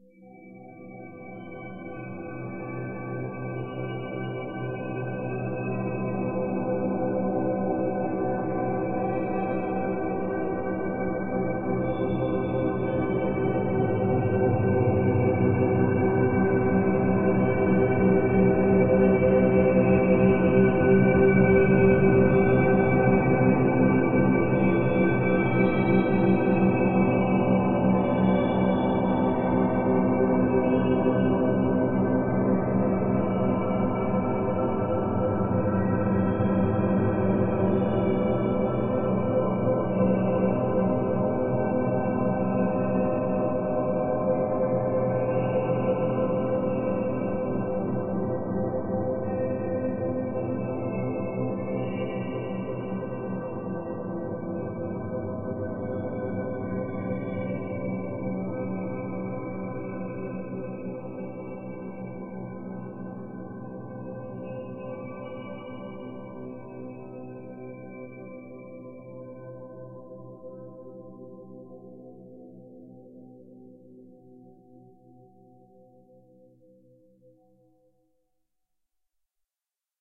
001 - ONE OF THOSE NIGHTS
abstract ambient soundscape
a digital sound created with audition mixing samples created with several free vst synths
created during the summer of 2016
2016, abstract, ambient, audition, free, sound, soundscape, synth, vst